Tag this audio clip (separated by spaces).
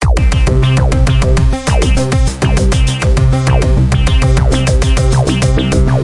shock suspense shocked